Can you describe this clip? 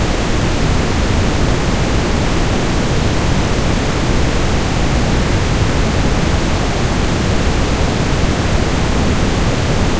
10 seconds of a loud and big waterfall (full loopable)